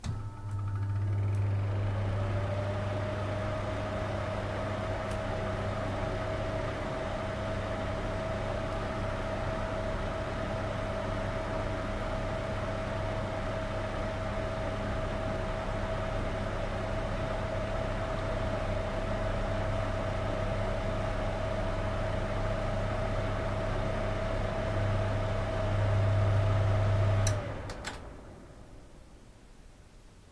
Sound of a ventilator. Switching on and off. Recorded with Zoom H1.
noise, switch, ventilator, click, fan